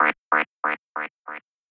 loop psy psy-trance psytrance trance goatrance goa-trance goa